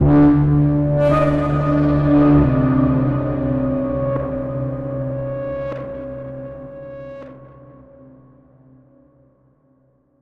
Unknown Origin
Ambient, Atmosphere, Spacey